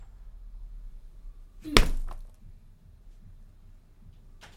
golpe a almohada